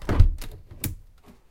Close window
Closing a windown in the main room.
window, slam